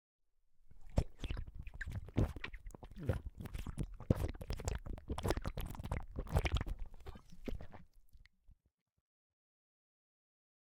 Drinking Beer
Produced from the drinking of beer.